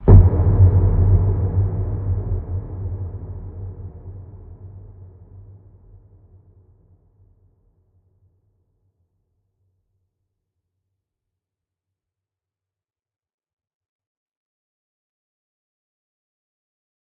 Wooden stairs impact 6(Eq,rvrb,MSEq,lmtr)
Atmospheric impact sound. Enjoy it. If it does not bother you, share links to your work where this sound was used.
Note: audio quality is always better when downloaded.
sound-design, boom, motion, swoosh, filmscore, cinematic, effect, low, game, swish-hit, metal, bass, stairs, hit, riser, impact, score, drum, trailer, film, boomer, fx, movie, kick, woosh, whoosh, sound, sfx, stinger, transition